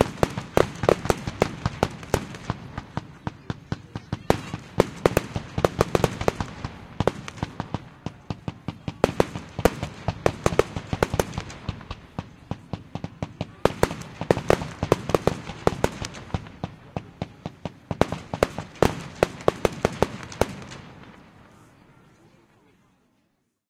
fireworks impact16
Various explosion sounds recorded during a bastille day pyrotechnic show in Britanny. Blasts, sparkles and crowd reactions. Recorded with an h2n in M/S stereo mode.
blasts, bombs, crowd, display-pyrotechnics, explosions, explosives, field-recording, fireworks, pyrotechnics, show